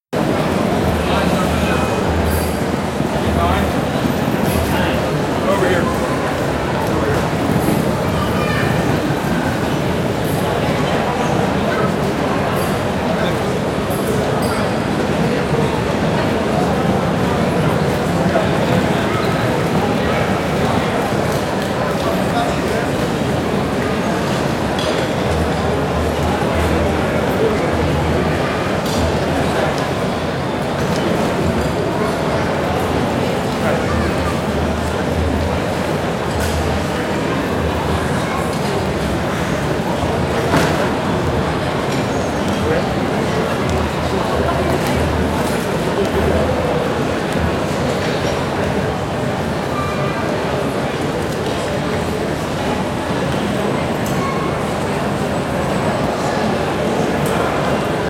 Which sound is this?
WALLA basic HALL
field, people, recording, walla